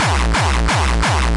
Hardcore Kick 1 - Loop 175 BPM
A 4/4 Loop of a Hardcore Kick. A single version is available in this package.
use it anyway you want but i would appreciate a note when and where you use it (but its not required).
made from ground up.
hard, hardcore, kick